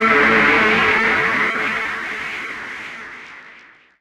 Synth squiggle #1
A short synth squiggle.
Digitally synthesized with LMMS & Audacity.
sample, synth, electronic